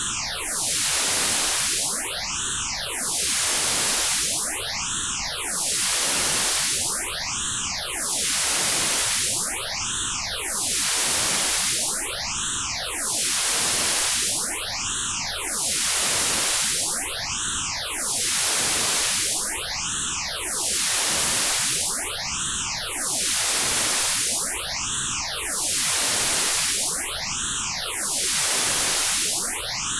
Leap Bounce Sound Effect
Leap sound effect
effect, Leap, sound